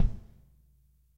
prac - kick light
percussion, drums, kick